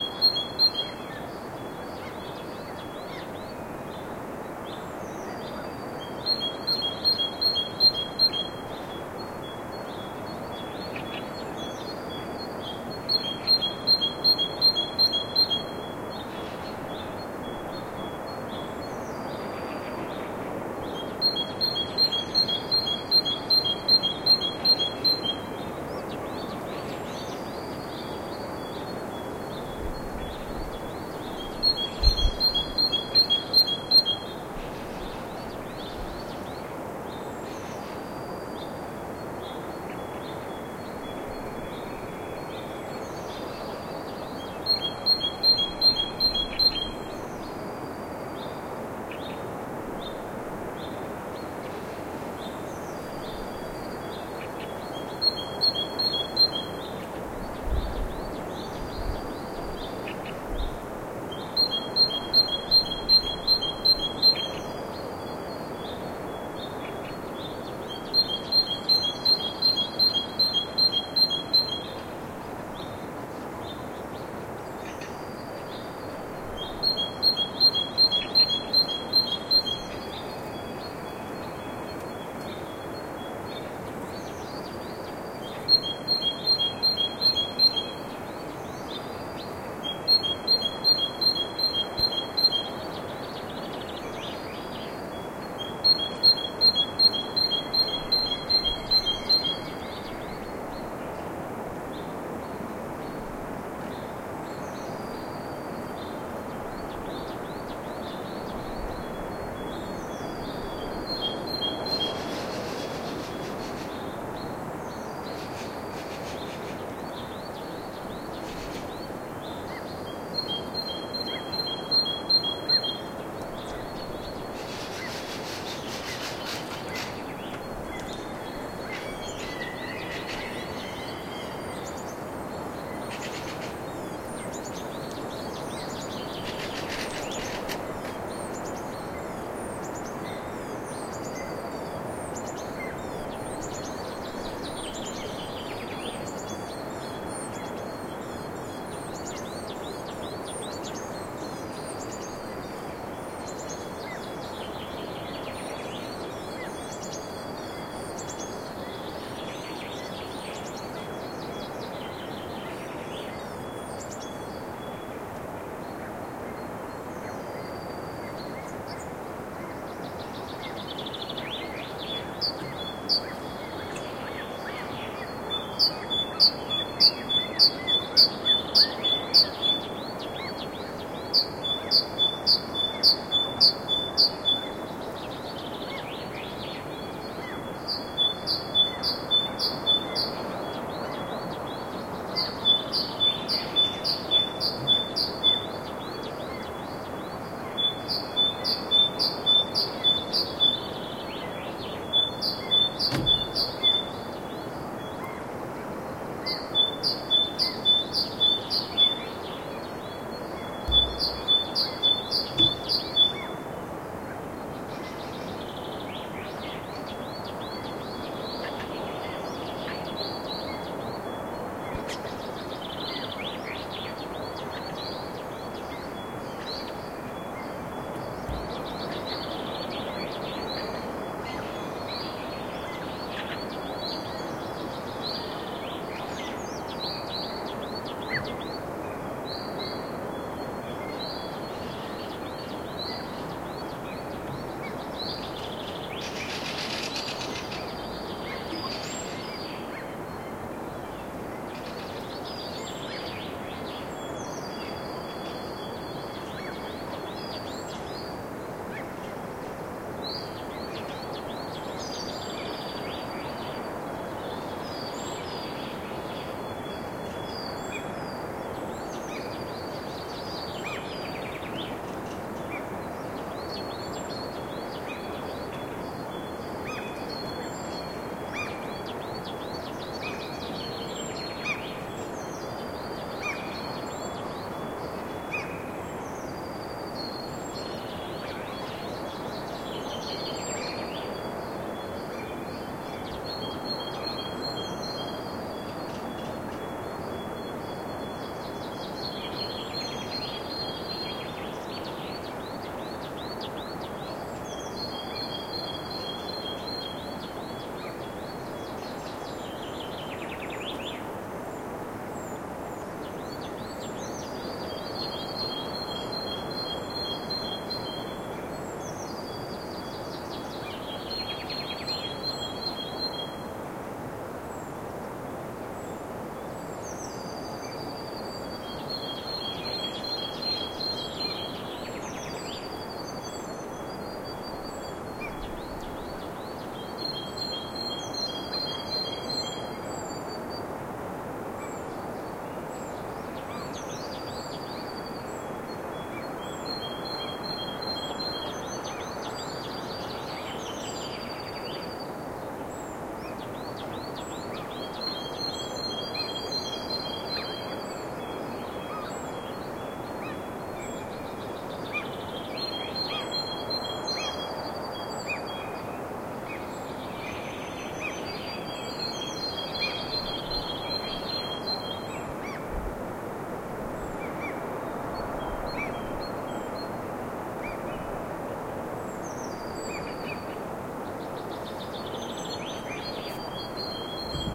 what midnight sounds like
Spring night with brids chirping in the forrest and a waterfall in the background. A little loud on the recording.
birds, night, Spring, waterfall